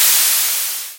Various drum and percussion sounds made only out of brown, pink and white noise and a few effects in Audacity.
noise, splash, hit, drum, cymbal, drums, minimal, static, percussion, kit, crash, multisample